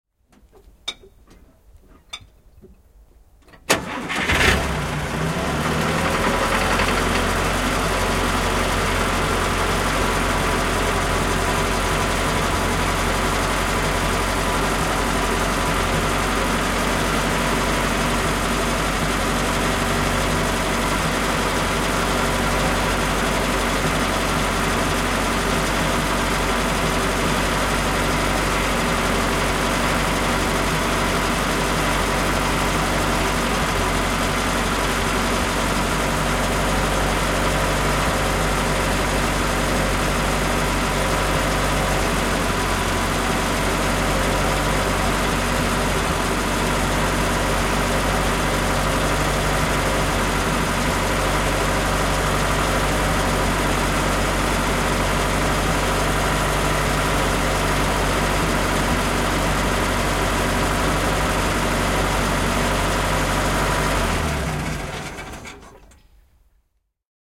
Henkilöauto, vanha, tyhjäkäynti / An old car idling, Mercedes Benz 170 SV, a 1954 model
Mercedes Benz 170 SV, vm 1954, mersu. Käynnistys, tyhjäkäyntiä, moottori sammuu.
(Mercedes Benz, 1770 cm3, 52 hv).
Paikka/Place: Suomi / Finland / Kitee, Kesälahti
Aika/Date: 16.08.2001
Auto, Autoilu, Autot, Cars, Field-Recording, Finland, Finnish-Broadcasting-Company, Motoring, Soundfx, Suomi, Tehosteet, Yle, Yleisradio